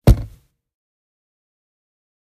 Varied hits on materials in my basement - cardboard boxes, a treadmill, wooden table, etc...most of the sounds from this pack were extracted from a recording of me striking said objects with my palm.
Because of proximity effect, I found some of these to be useful for the sound of an object hitting the ground.
noise, wood, foley, floor, tap, tall, 2x4, thud, drop, thap, impact, ground